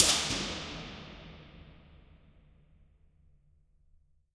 Concrete Tunnel 02
Impulse response of a long underground concrete tunnel. There are 7 impulses of this space in the pack.
Impulse, IR, Response, Reverb